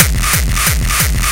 xKicks - Earpiercer
Do you LOVE Hard Dance like Gabber and Hardstyle? Do you LOVE to hear a great sounding kick that will make you cry its so good?
Watch out for This kick and Several others in the xKicks 1 Teaser in the Official Release Pack.
xKicks 1 contains 250 Original and Unique Hard Dance kicks each imported into Propellerheads Reason 6.5 and tweak out using Scream 4 and Pulveriser